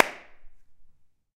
Pack of 17 handclaps. In full stereo.